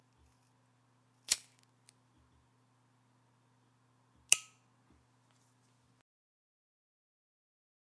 An ordinary bic lighter sound .Recorded with HTDZ-HT81
sound, lighter